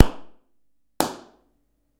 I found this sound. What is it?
Small Plastic Bag Explosion
Making a small plastic bag pop.
Recorded with a Zoom H2. Edited with Audacity.
Plaintext:
HTML:
tiny, pop, plastic, bag, explode, small